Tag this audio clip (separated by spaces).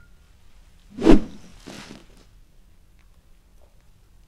time-processed whoosh